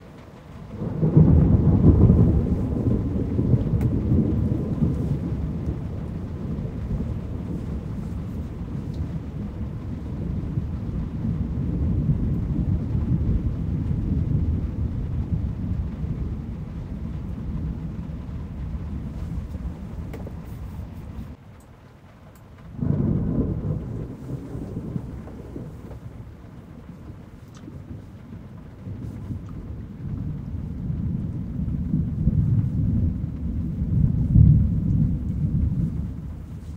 thunder recorded whilst inside my house